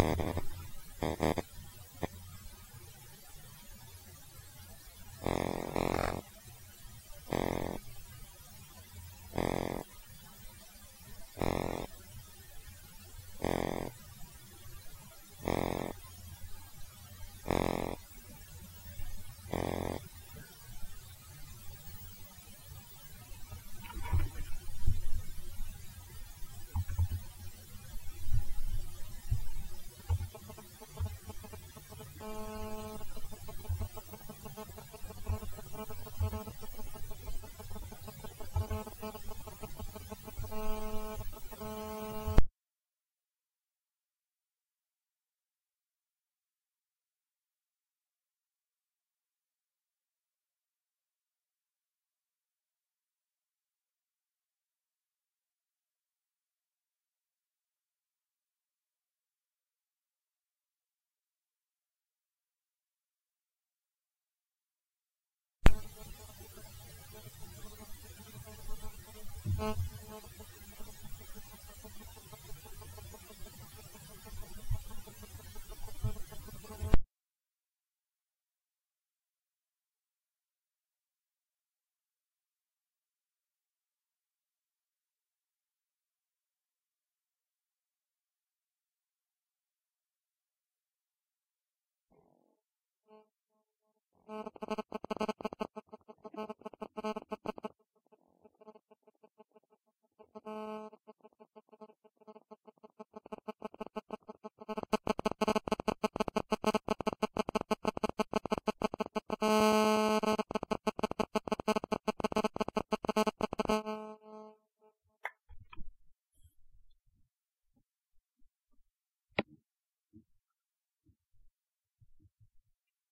Recorded with a laptop and my cell phone this is the sound you have all heard before. Use it wisely, I suspect it will take off like that richard hung song.
glitch, buzz